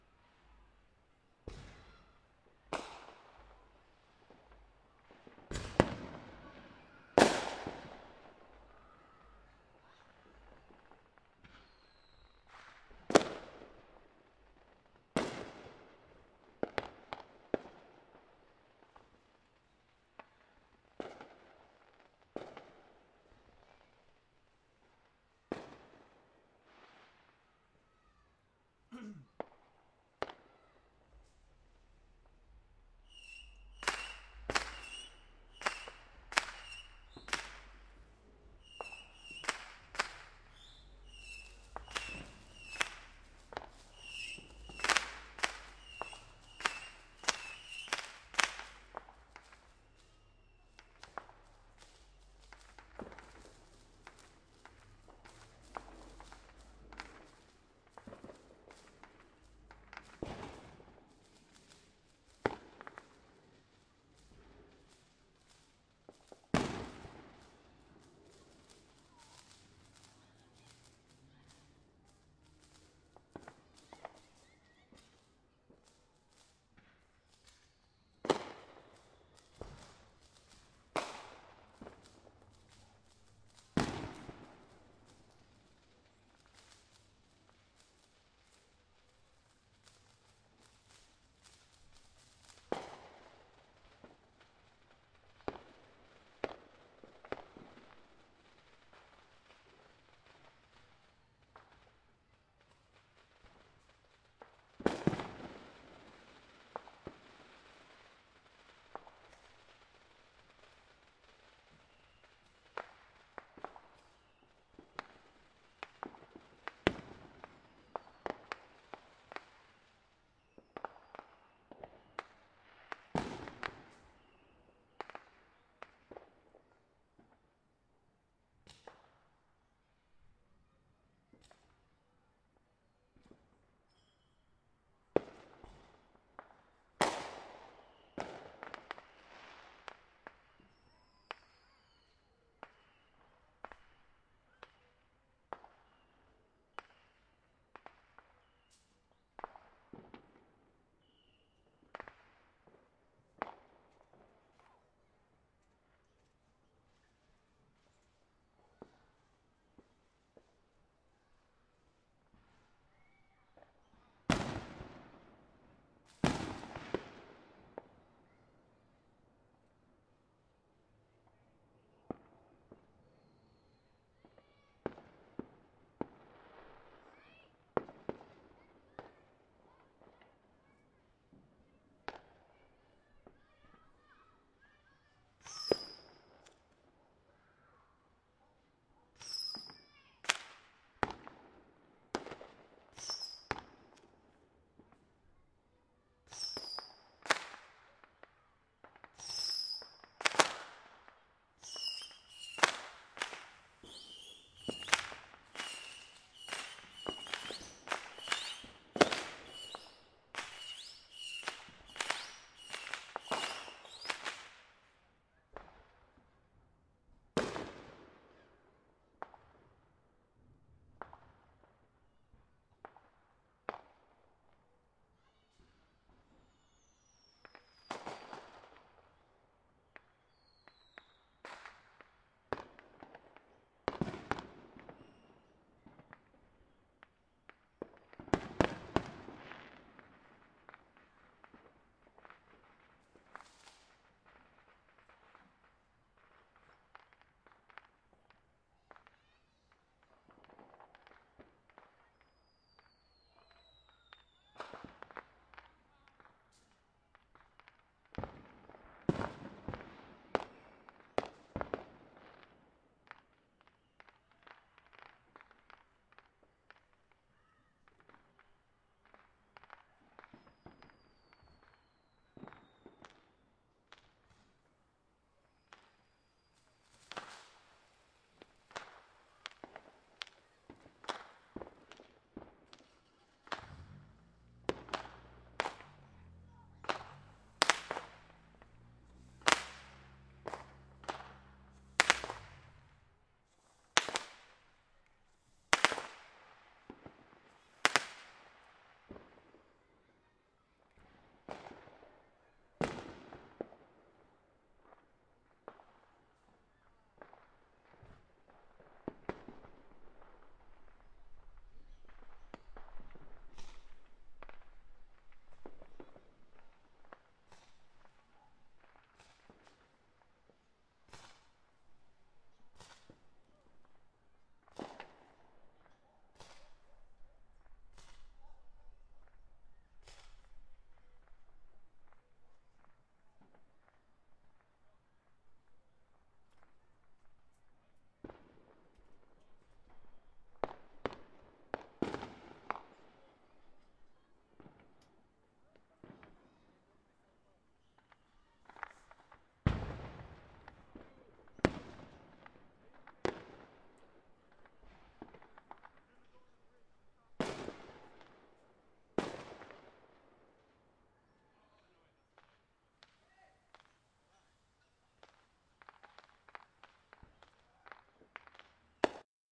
My neighborhood on July 4, 2012 (Independence Day, US). Lots of illegal bottle rockets and mortars. Recorded from my office window (geotag map shows exact mic location!).
boom, field-recording, fireworks